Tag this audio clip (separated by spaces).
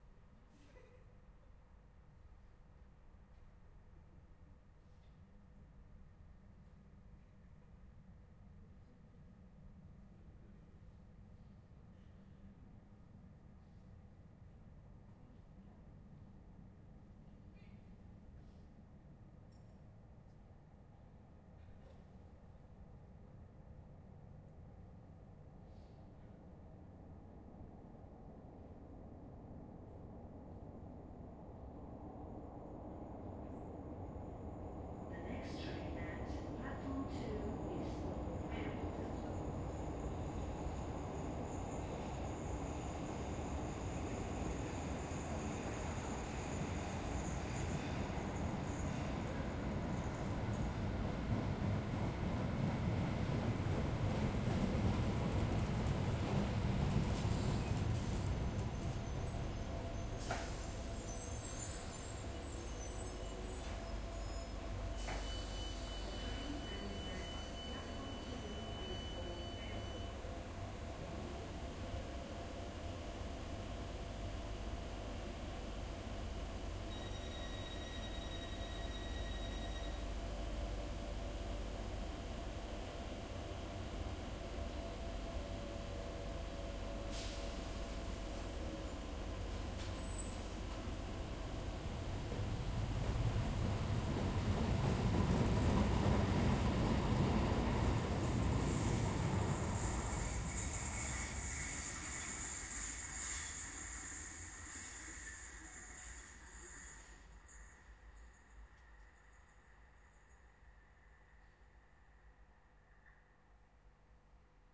arrives metro overground station subway travelling underground